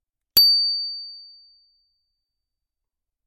bicycle bell 03
Sound of a bicycle bell. Recorded with the Rode NTG-3 and the Fostex FR2-LE.
bell, bicycle, bike, cycle